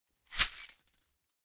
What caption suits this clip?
Pile of broken glass gathered in a felt cloth and shaken. Close miked with Rode NT-5s in X-Y configuration. Trimmed, DC removed, and normalized to -6 db.
shake, glass, shuffle, broken